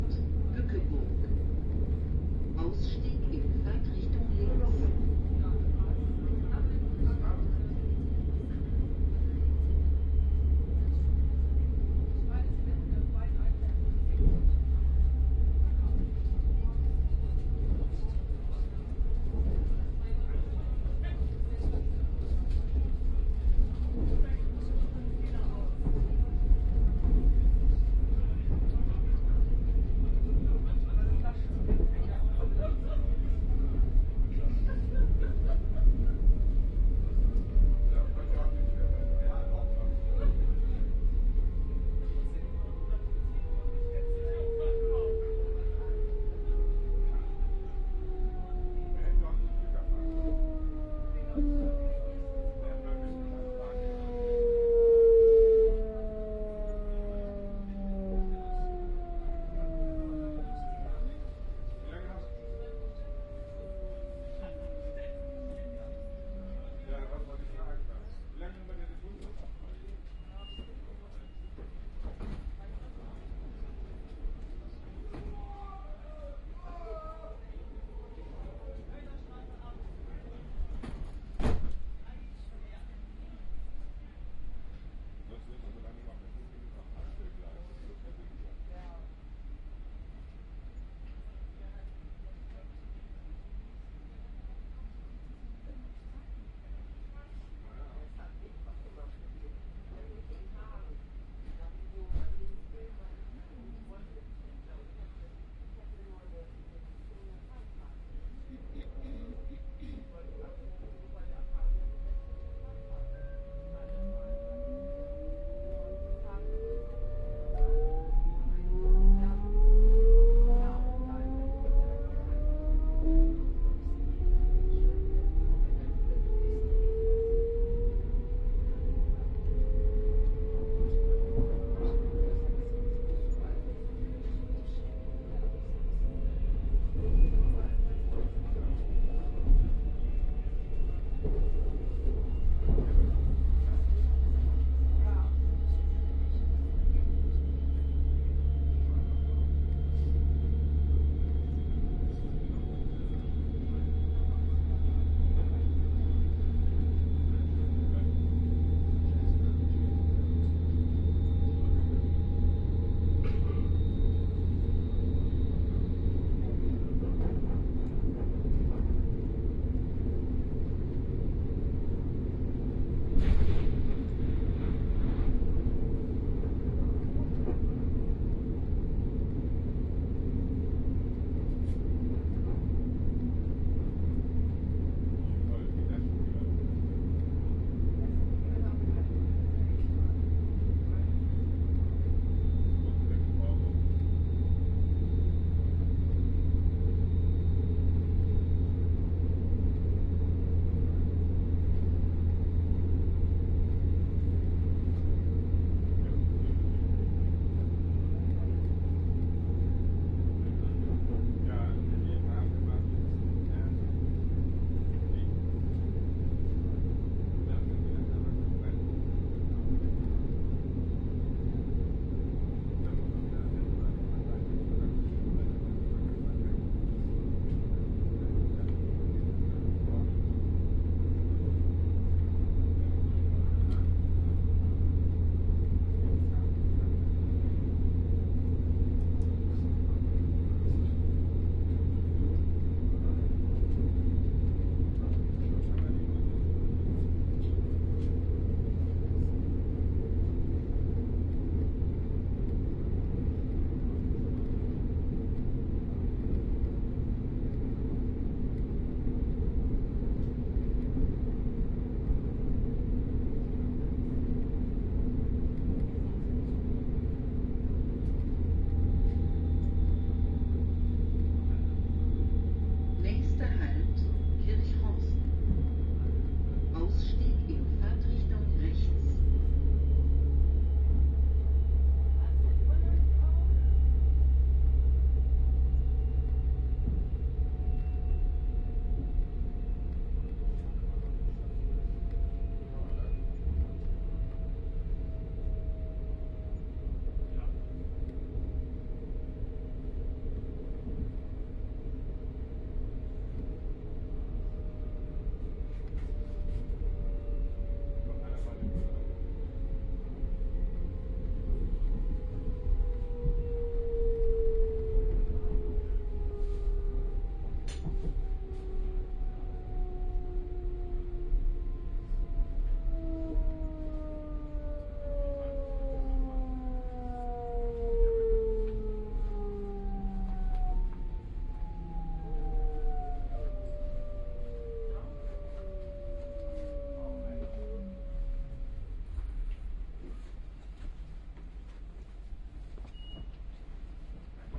on the commuter train
Some announcements and very spooky breaking and starting noises on this commuter train. Apart from that, people talking and laughing. Binaural recording, using the R-09 HR recorder and Soundman OKM microphones.
binaural, field-recording, railway, s-bahn, spooky, train